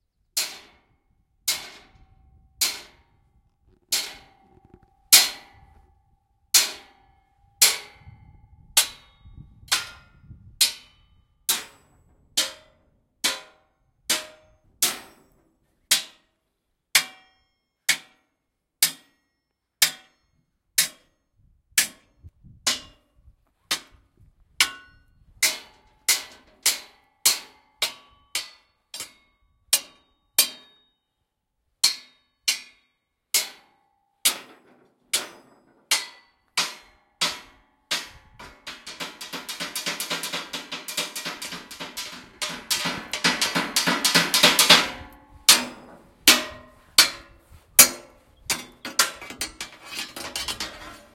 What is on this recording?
Metallic Hits Various
Impact, Boom, Smash, Tools, Plastic, Hit, Tool, Friction, Bang, Steel, Crash, Metal